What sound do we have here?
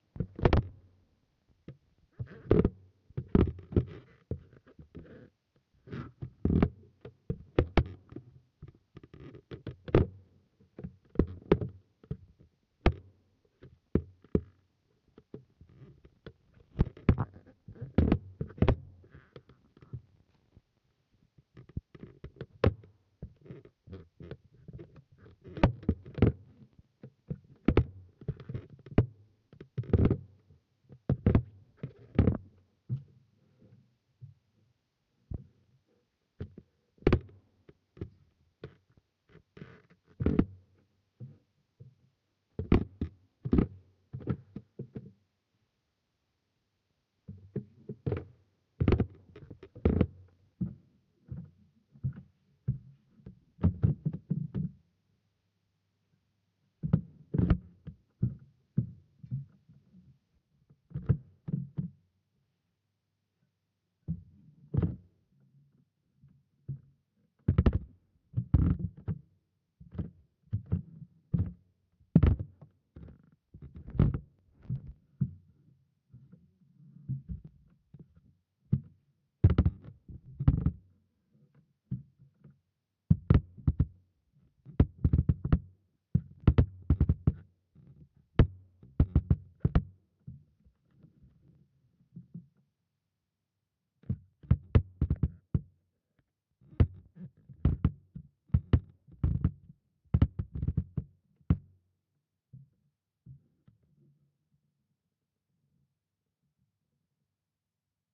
Creaks and groans. Muffled with a bit of crunch from microphones
Microphone: 2 x cDucer contact mic's in stereo